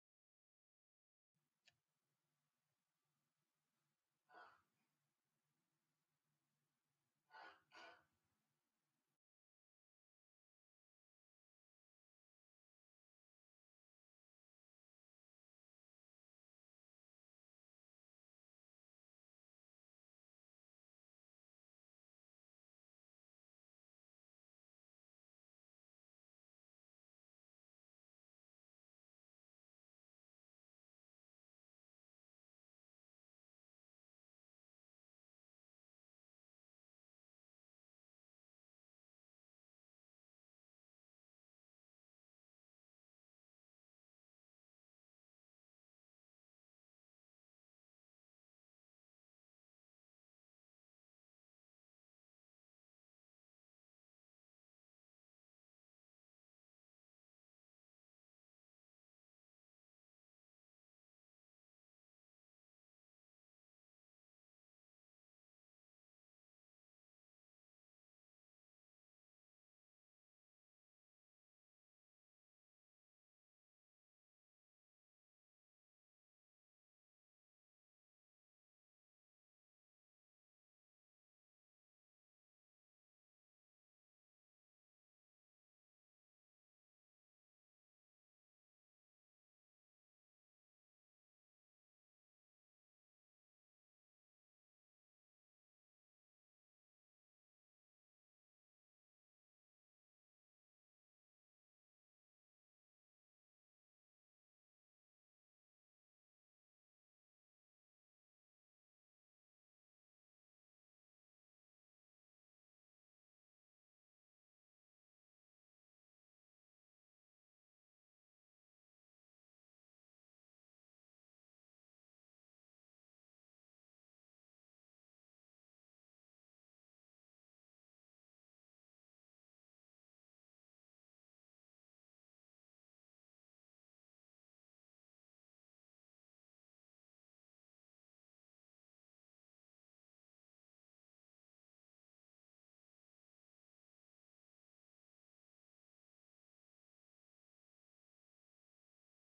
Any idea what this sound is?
Manipulated Breathing
Breathing that was manipulated to give it a metallic sound. Recorded with a Macbook.